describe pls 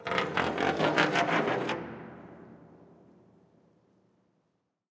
Recordings of different percussive sounds from abandoned small wave power plant. Tascam DR-100.
ambient, drum